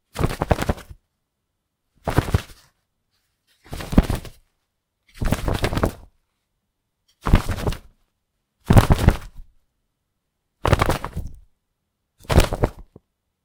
hat shake
Shaking a baseball cap/hat
hat, shake